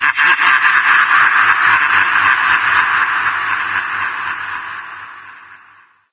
laugh clowny ghoul